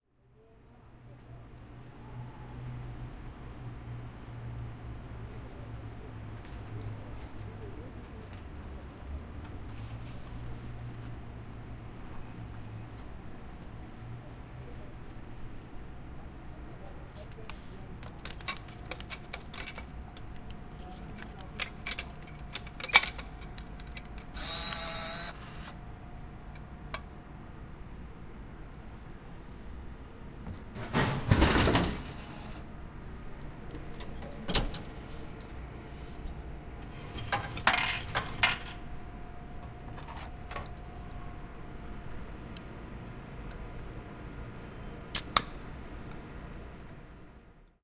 0322 Can machine
Can machine, coins, and open the can. People in the background.
20120620